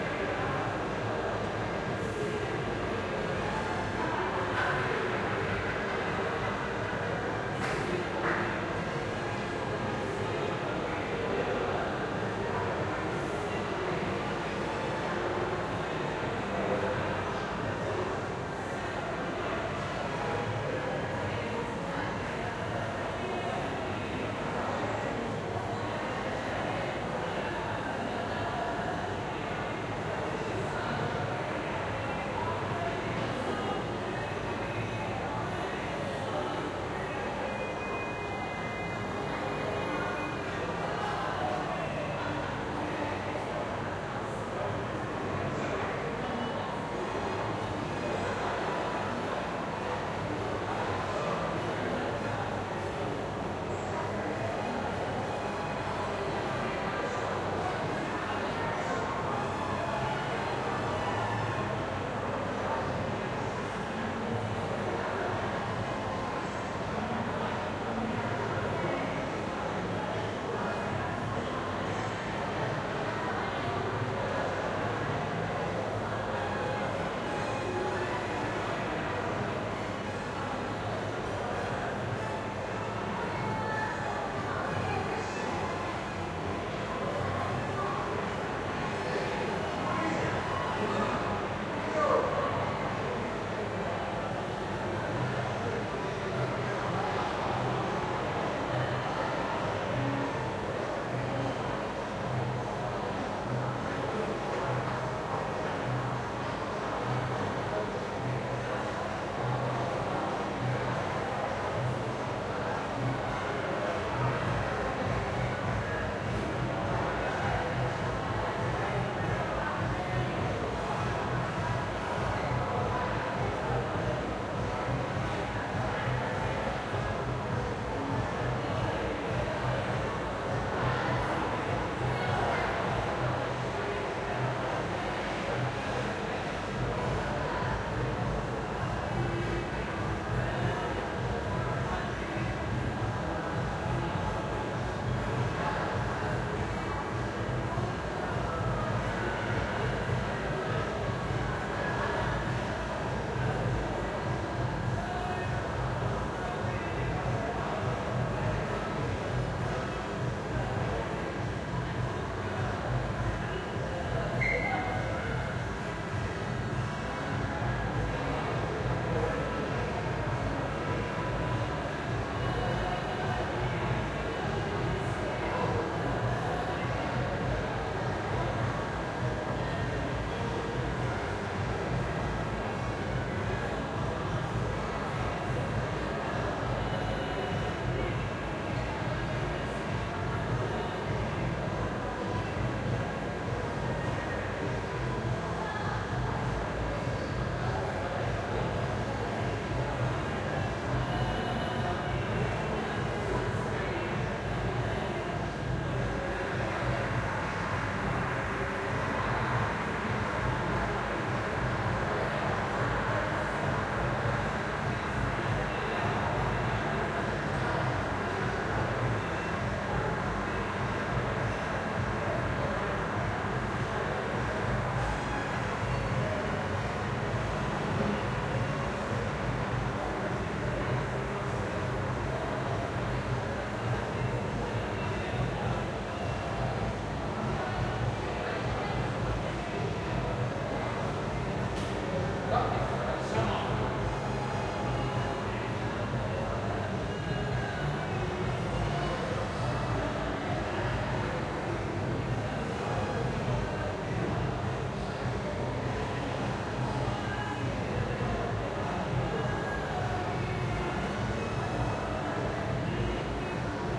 Recorded with an indirect view of a busy hub of pubs and clubs playing all arabic music. The soundscape is that of distant Music, People and General ambience at night.
Location Beirut-lebanon Hamra indoor hall.
Used stereo shoeps into a 788 Sound devices recorder.